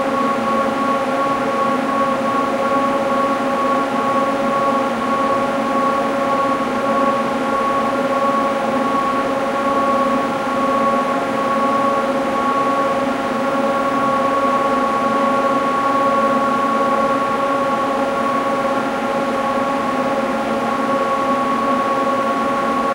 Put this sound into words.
air conditioner 2
Hardcore industrial rackmount air conditioner.
air, fan, industrial, rack